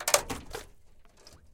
Somewhere in the fields in belgium we found a big container with a layer of ice. We broke the ice and recorded the cracking sounds. This is one of a pack of isolated crack sounds, very percussive in nature.
break, crack, crunchy, ice, percussive